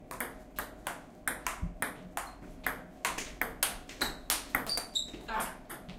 Office staff play ping-pong. Office Table Tennis Championships sounds.